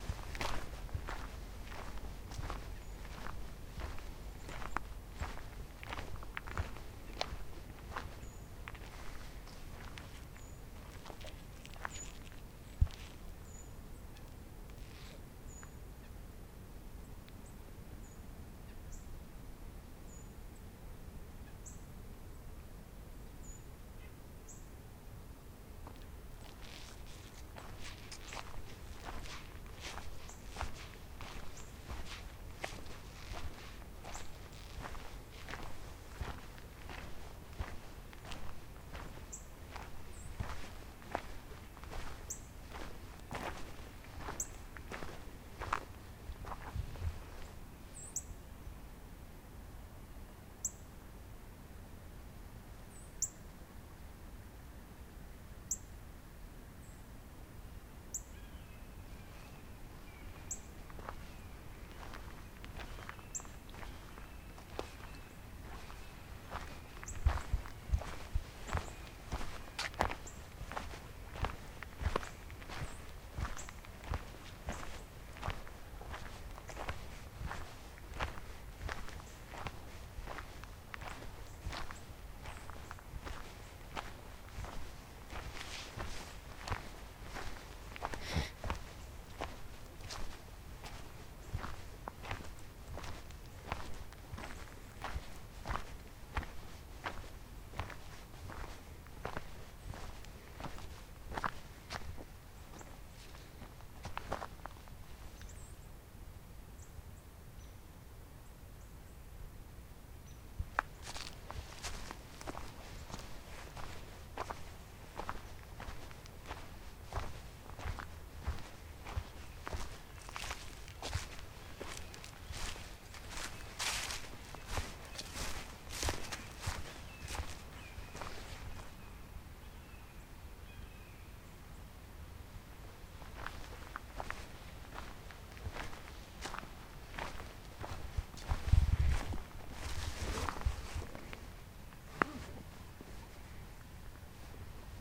WinterWoodsWalkJan14th2016
A peaceful walk near the Cache River in southern Illinois in mid-winter. The occasional birds calls out.
Recording made at 2:30 in the afternoon on a very mild - 53 degree day in Mid January.
Equipment used: Marantz PMD-661 and Beyerdynamic microphone